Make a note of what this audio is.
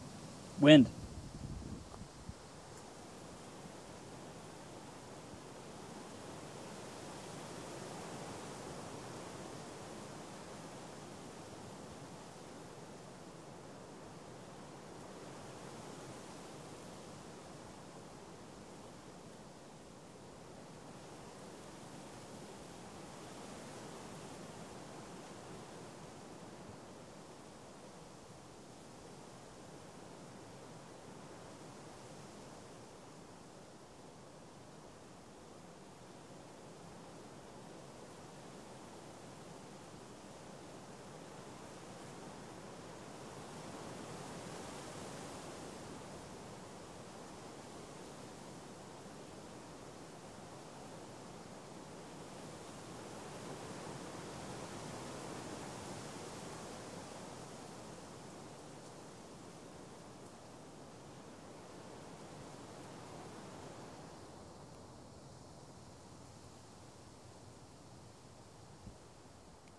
wind through trees.